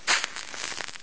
A match dipped in a cup of water.
crackle, extinguish, fizz, fizzle, hiss, match